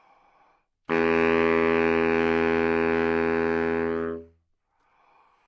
Part of the Good-sounds dataset of monophonic instrumental sounds.
instrument::sax_baritone
note::E
octave::3
midi note::40
good-sounds-id::5531